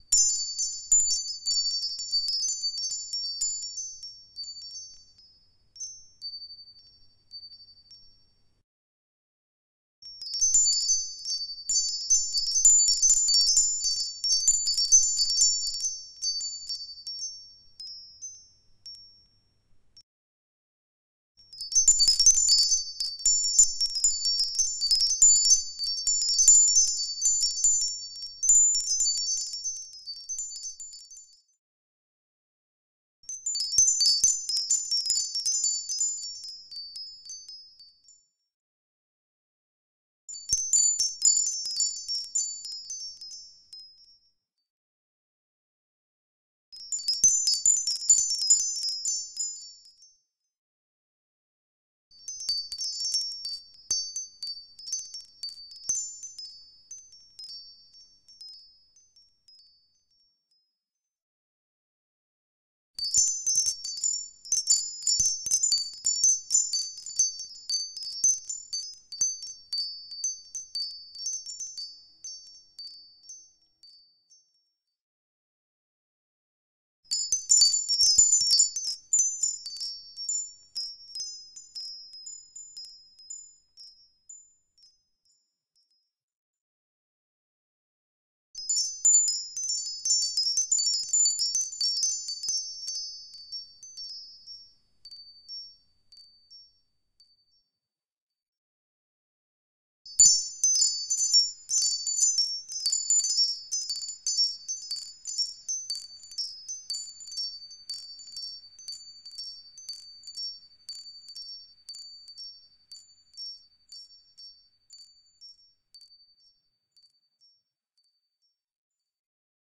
This is the recording of me while moving a little windchimes.
Line input;